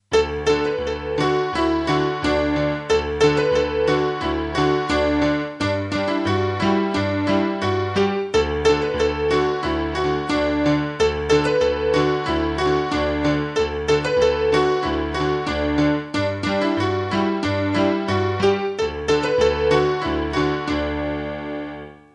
Honky-tonk piano, background for Western saloon scene. Composed by me.